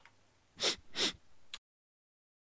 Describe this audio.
Just a recording of me sniffing two times
Used it in my cartoon Gifleman
sniffing, nose, human